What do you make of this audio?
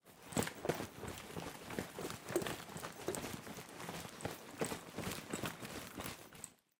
Tools Backpack RattleMovement 002
Foley effect for a person or character moving with a backpack or book bag.
moving, backpack, movement, tools, gear, bag, clothing